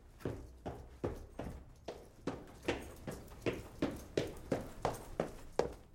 running up stairs
up, moving, stairs